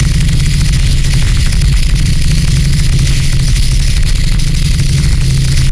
Turret Fire
This is a sound I made which I thought sounds like a turret firing out of an apachi helicopter :P this is loopable, if it's not perfect then im sure a little editing will fix it
Recorded with Sony HDR-PJ260V then edited with Audacity
apachi, army, field, fire, firing, gun, helicopter, light, lmg, loop, loopable, machine, military, recording, shoot, shooting, shot, sound, turret, war, weapon